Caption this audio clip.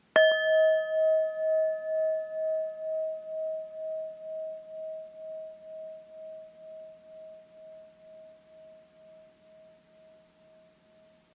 7 chakra “5 bowl tap